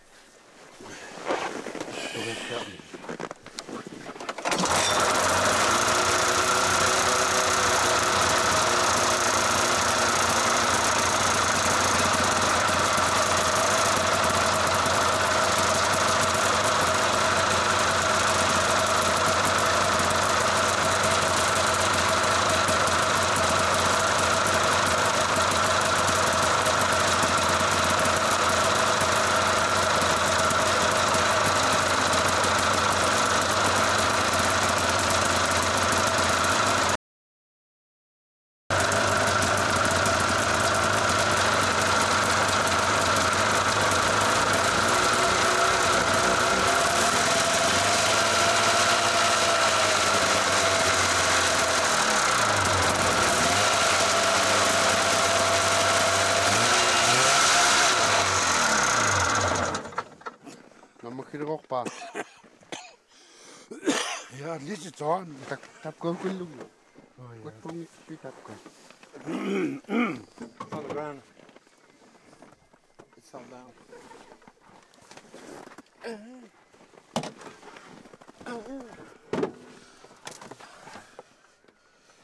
snowmobile rattly broken start, run shaky, shut off, false start